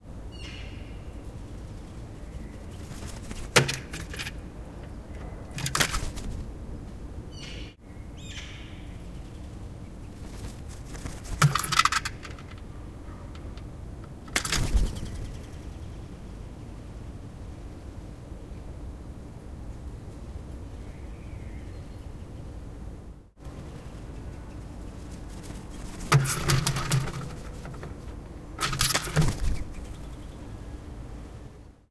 Iwans Neighbour Pica Pica
A magpie landing on my metal window ledge, because I put some hardened porridge there to lure it. It lands, picks a piece of food and flies away. It does so three times with two minutes and 15 seconds time between. I normalized the track and isolated the landing/starting parts. No noise removal.
Recorded with Zoom H2. Edited with Audacity.
bird, common-magpie, elster, Eurasian-Magpie, european-magpie, flap, hunt, land, magpie, pica-pica, snap, start, wings